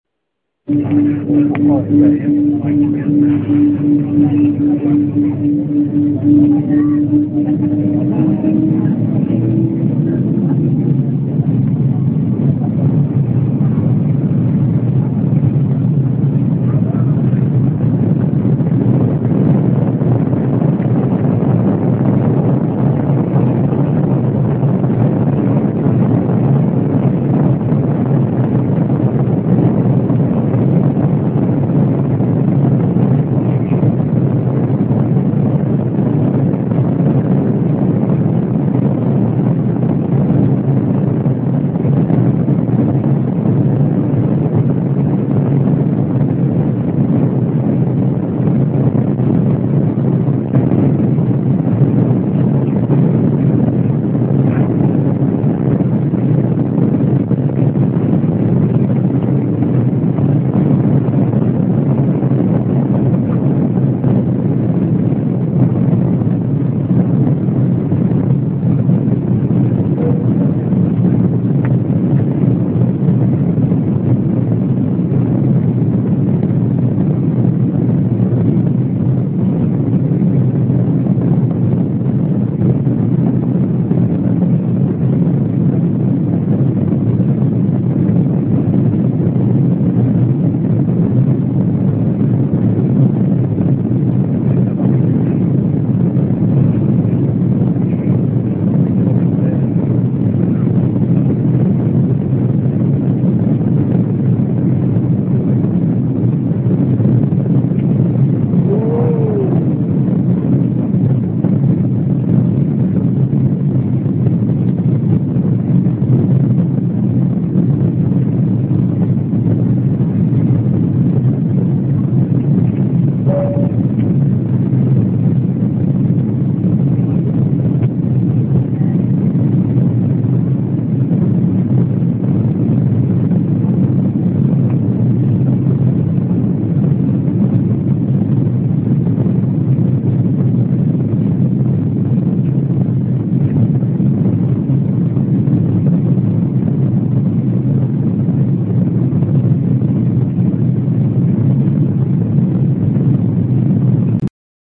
Take off
Plane taking off